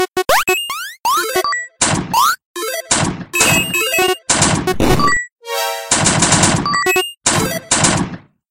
video game
game, play, playing, retro, video